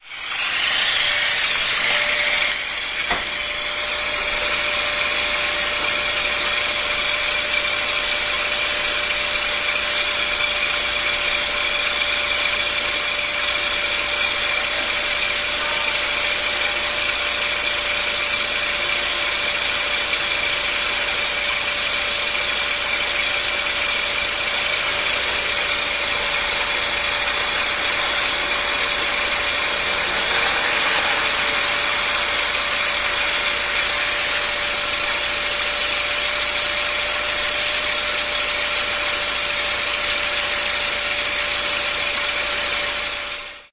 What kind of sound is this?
bmw engine220810
22.08.2010: about 21.30. crossroads of Gorna Wilda nad Dolina Streets in Poznan (in Poland). the whirr of BMW engine. the driver went somewhere. some woman was sitting on the passenger seat.
whirr, poznan, bmw, noise, engine, field-recording, wilda, poland, car, street